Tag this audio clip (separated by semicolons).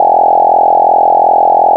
electronic; noise; popping